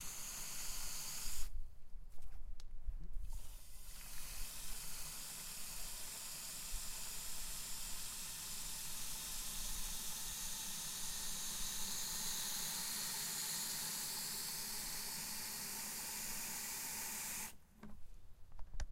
Water spray gun 2
Water gun garden hose